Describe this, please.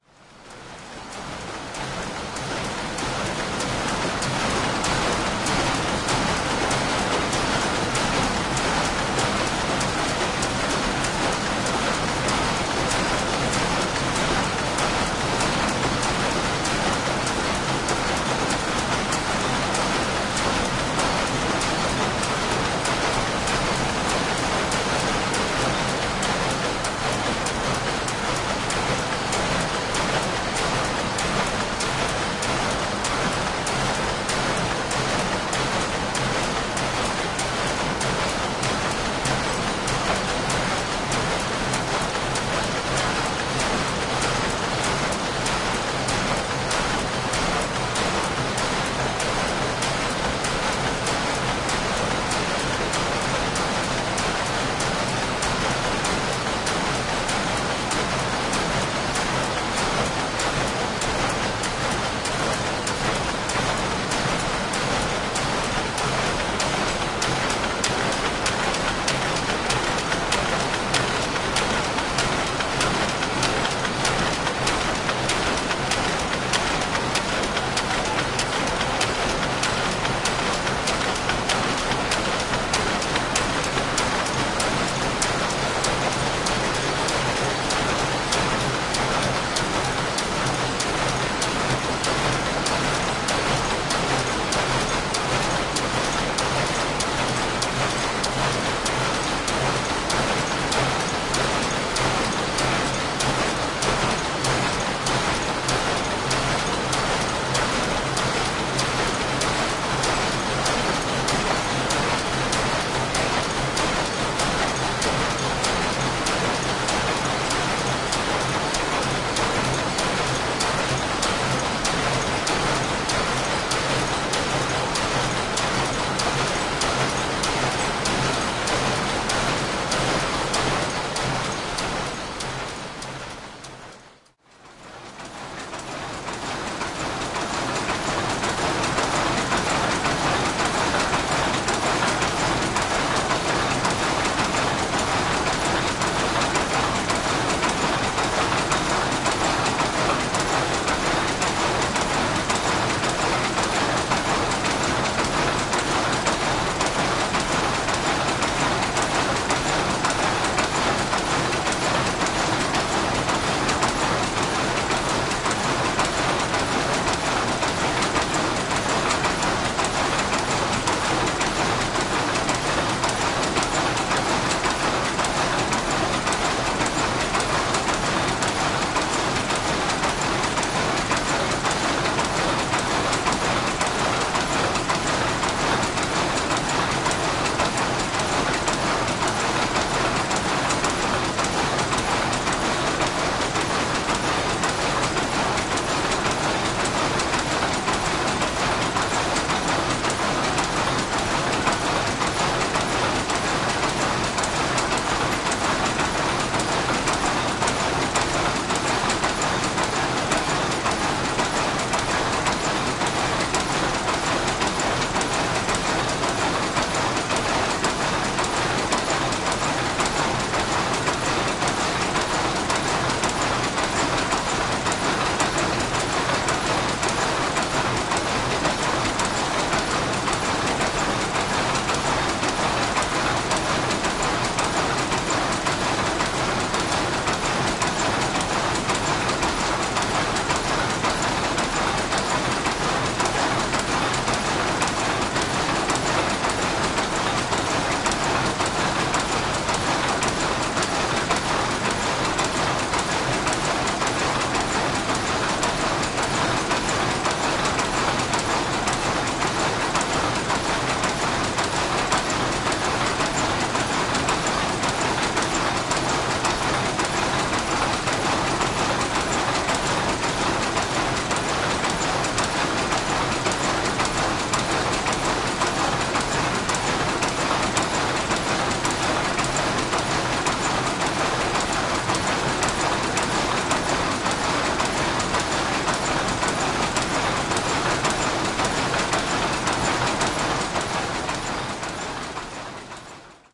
Power Loom - China
Recording of power looms in action, Lao Da Fang village, Zhejiang Province, People's Republic of China.
In this rural village, a cottage industry of embroidered cloth production has developed. Each farmhouse seems to have an attached shed with several power looms running 24/7, making drapes and table cloths.
Sony PCM-D50